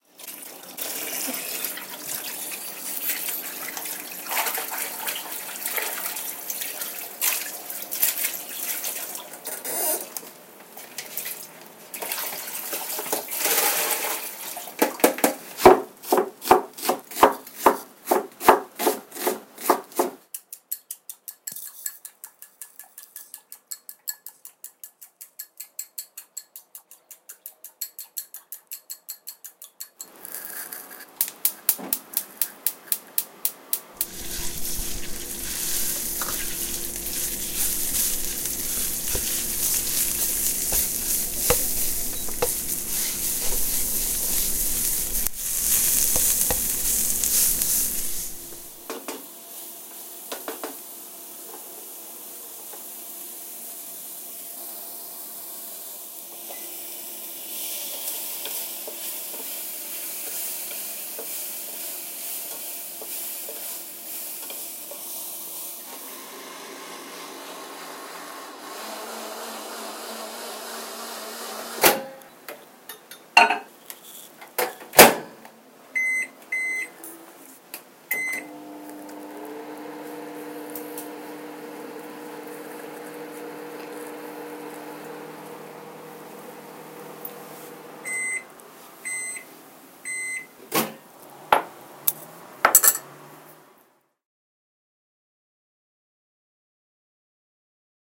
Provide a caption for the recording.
Vegetarian restaurant kitchen
Field Recording for the Digital Audio Recording and Production Systems class at the University of Saint Joseph - Macao, China.
The Students conducting the recording session were: Moon Cheung; Coby Wong; Eva Chen; Phoebe Ng; Celia Long
steam, washing, field-recording, soundscape, shopping, cooking, mixer, macao, frying, microwave, kitchen, boiling